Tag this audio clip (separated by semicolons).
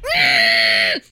growl; large